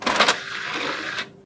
Disc drive open
DVD drive opening up without anything in the tray.
dvd, cd, open, disc